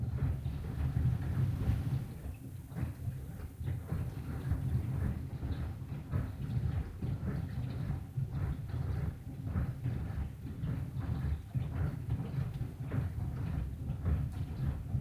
Captured by recording a dishwasher in operation. Gives a background beat to an ambient track. The sound of a constant beat as a mechanical machine operates and occasionally drones.
Factory, Industrial, Machine, Machinery, Mechanical